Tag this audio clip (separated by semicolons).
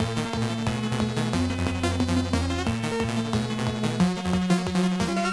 180; stupid; loop